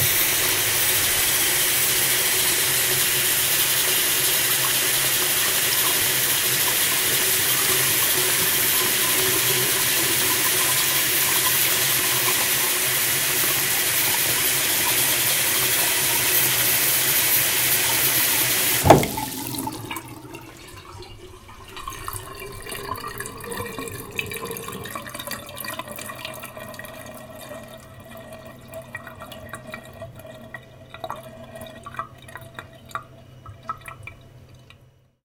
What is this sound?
Recorded in my bathroom with B1 and Tubepre.
faucet, water, sink